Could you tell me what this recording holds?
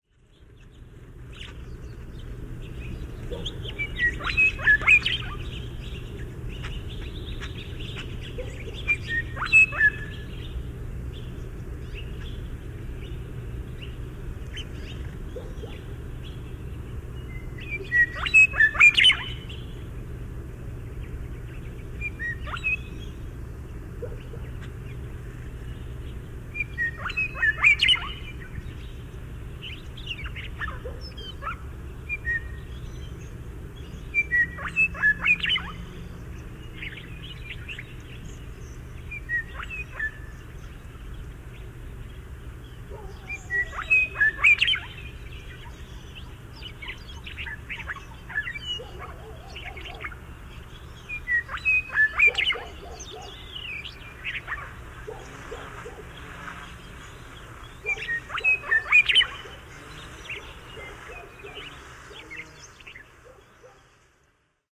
Western Meadowlark 17feb06
sturnella-neglecta, western-meadowlark